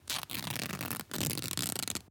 Ripping T Shirt 3
Recording of me ripping a t-shirt.
High-mid frequency and low-mid frequency fabric tearing.
Recorded with an Aston Origin condenser microphone.
Corrective Eq performed.
tear, t-shirt